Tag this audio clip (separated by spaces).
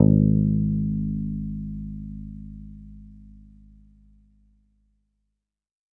guitar multisample